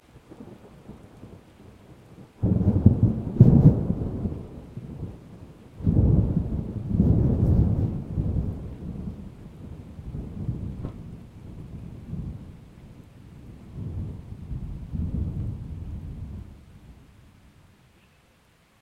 Thunder roll 10
This is a recording of distant rolling thunder from a thunderstorm that the Puget Sound (WA) experienced later in the afternoon (around 4-5pm) on 9-15-2013. I recorded this from Everett, Washington with a Samson C01U USB Studio Condenser; post-processed with Audacity.